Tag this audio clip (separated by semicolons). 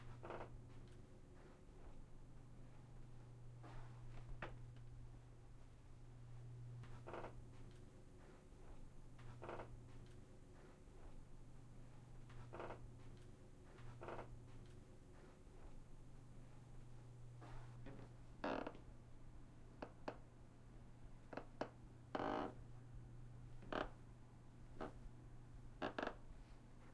creaking; floor; floor-boards; squeaking